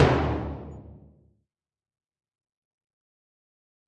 A clean HQ Timpani with nothing special. Not tuned. Have fun!!
No. 23
drums, timp